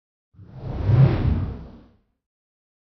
long wispy woosh2
01.24.17: Long slowed-down woosh for motion design with a lessened low-end.